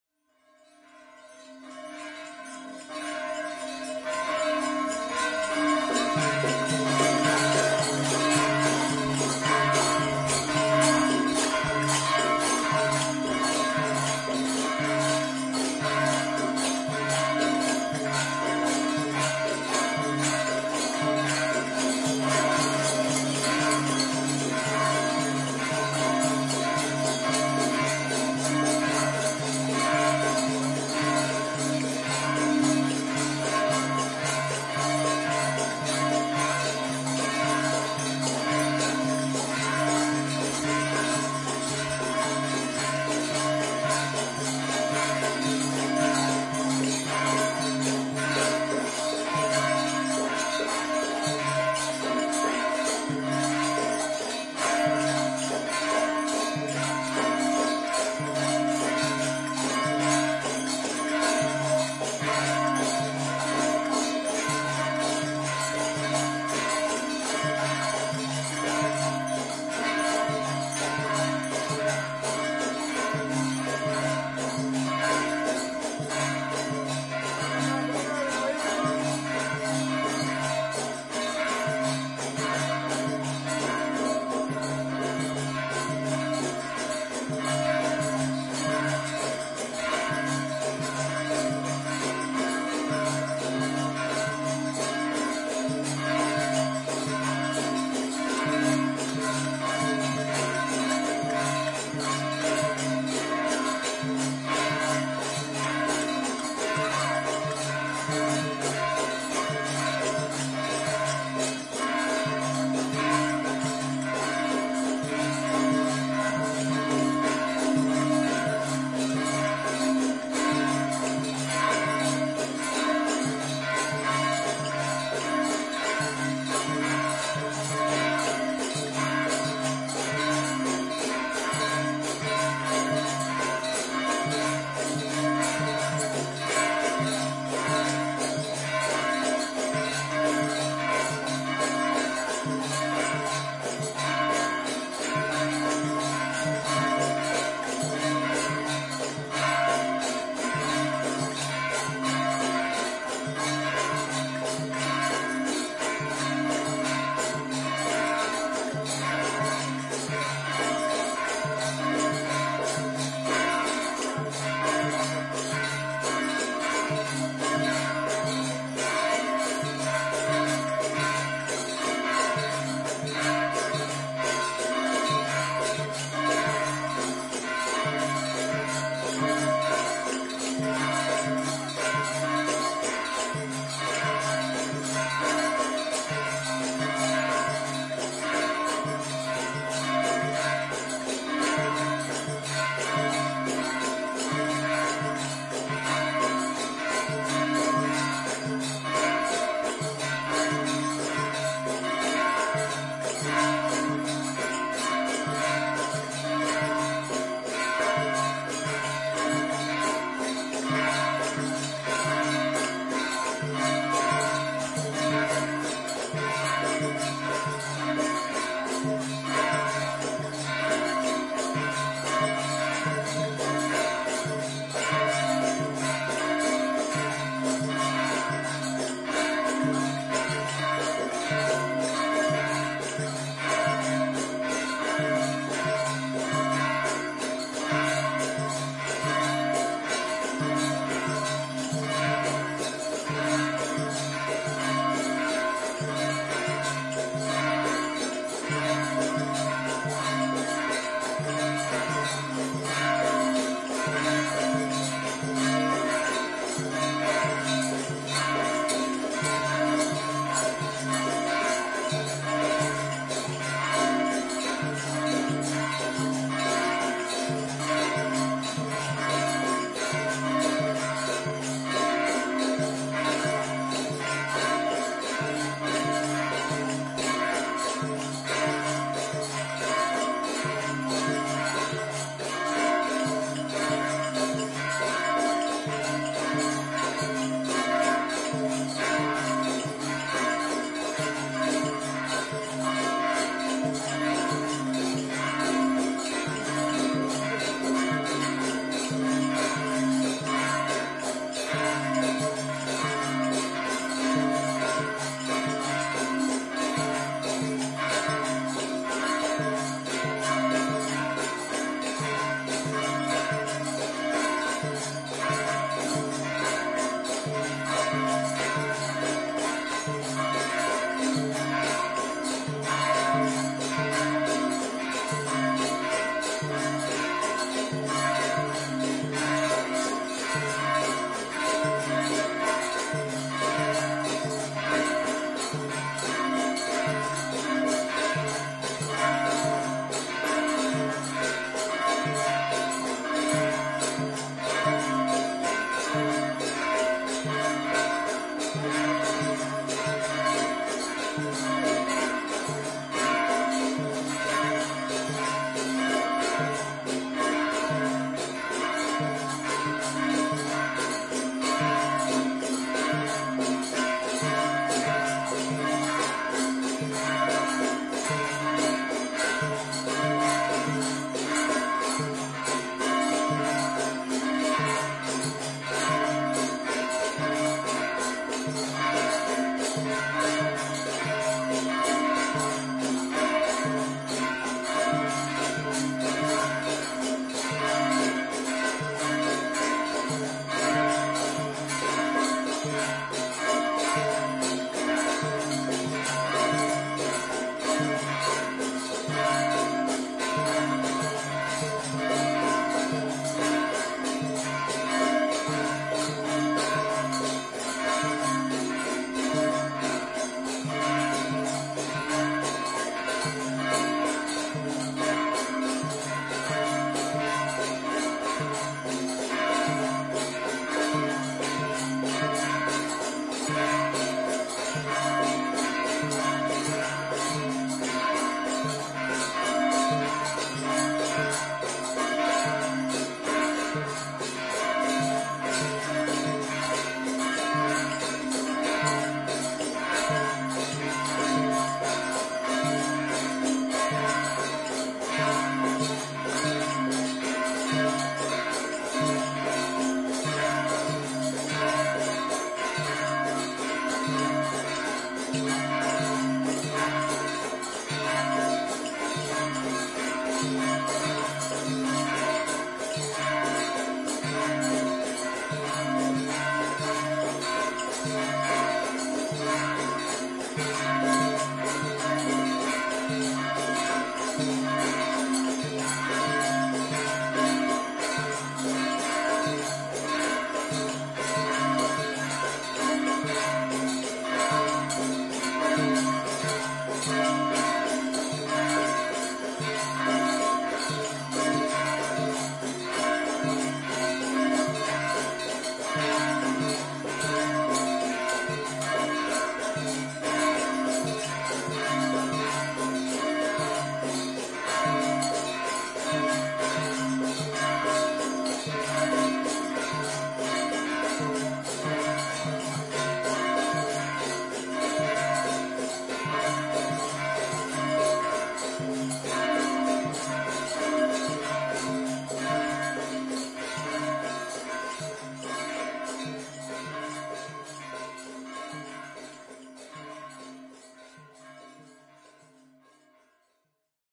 hindu ritual (Pūjā) in the temple with bells
Priests (Archaka) making the ceremony (Pūjā) and pulling the bells, ca. 50 prayers playing on different size of Manjira in Thillai Nataraja Temple, Chidambaram.
ZoomH2N
Name me if You use it:
Tamás Bohács
bell, bells, chant, clap, crowd, ecstasy, folklore, hindu, india, j, manjira, p, pray, prayer, religion, religious, tabla, temple